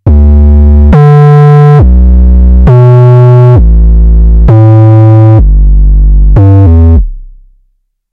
Moog Model 15 App Kick Drum session 01 2021 by Ama Zeus 7
This is Model 15 app kick recorded with Solid State Logic audio interface and some other analog gear.Have fun!
Greets and thx!
Drum, Moog-Model-15-app, 808, Analog, Synth, Solid-State-Logic, Moog, Sample, SSL, Kick